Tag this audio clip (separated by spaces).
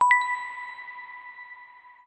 coin diamond game item note object pick-up